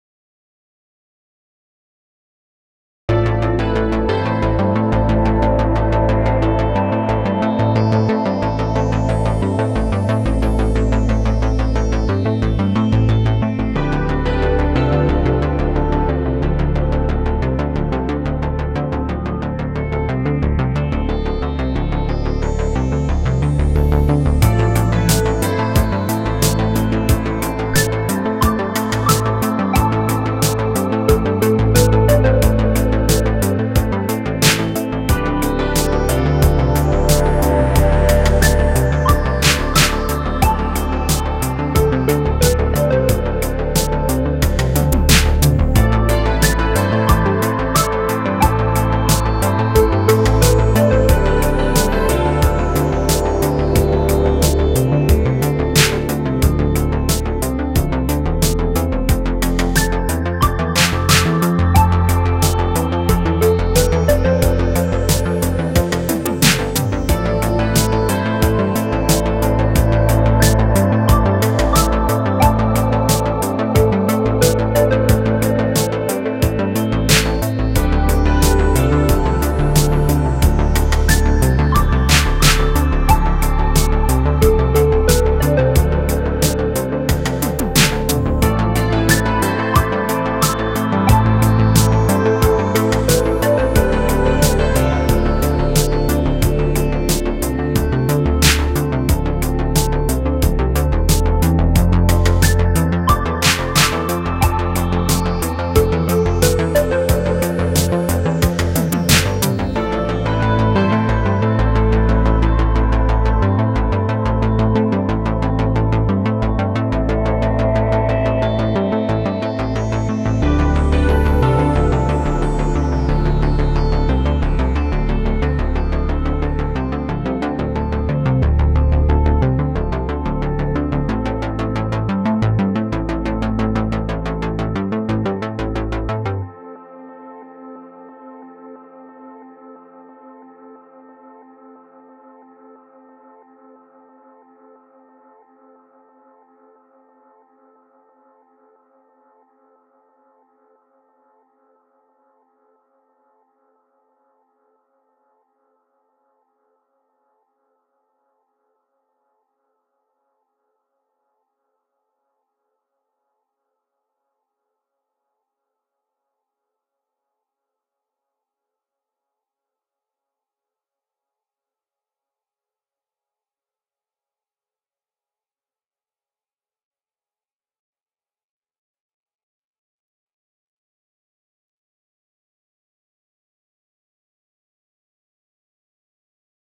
Berlin Town – Synthwave Track for Retrowave and Games
Berlin Town is a lush synthwave track that blends slow pop tempos with dreamy 80s synth textures.
Thank you for listening.
USAGE RIGHTS AND LIMITATIONS
ABOUT THE ARTIST:
Creatively influenced by the likes of Vangelis, Jean Michel Jarre, KOTO, Laserdance, and Røyksopp, Tangerine Dream and Kraftwerk to name a few.
USAGE RIGHTS AND LIMITATIONS:
Thank you for your cooperation.
Take care and enjoy this composition!